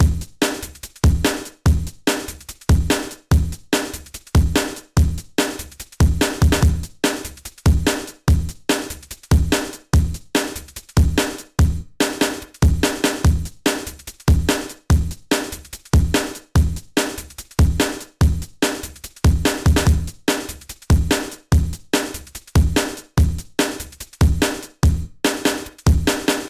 fast break or slow d'n'b Drumloop created by me, Number at end indicates tempo